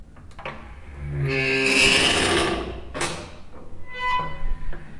toilet door
High frequency sound obtained by recording the wc door opening and closing.